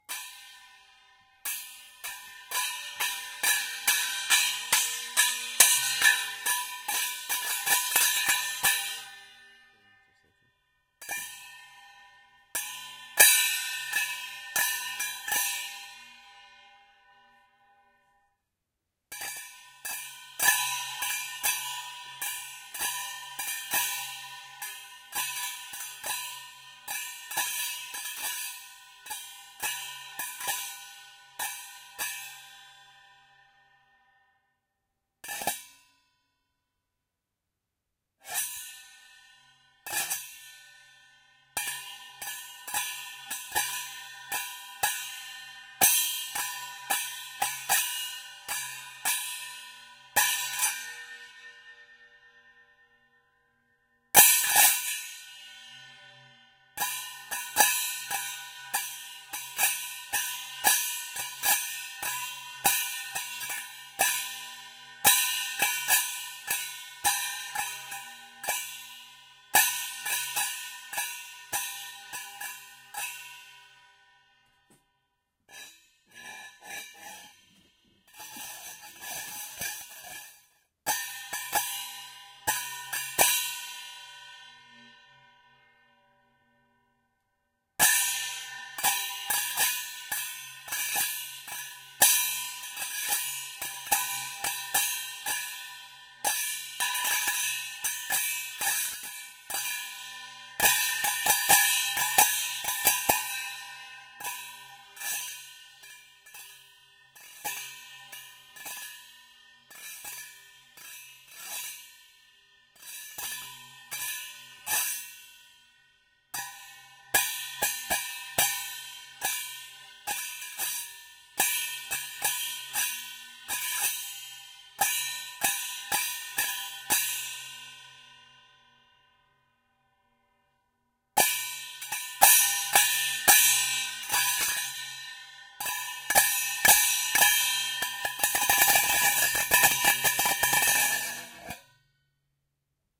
Japan Asian Hand Cymbals Improv
A pair of small hand cymbals, from the instrument collection of my friend in Kashiwa, Japan. Improvising, hopefully good to chop and loop.
Recorded with Zoom H2n in MS-Stereo.
rhythmic, metal, handcymbals, traditional, percussive, ethnic, acoustic, percussion, groovy, Japanese, drum, hand-cymbals, scraping, scrape, rhythms, cymbals, iron, improv, Asian, China, improvised, Chinese, metallic, jam, rhythm, matsuri, drums, Japan, steel, cymbal